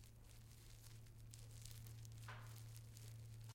popping bubble wrap
popping, wrap, bubble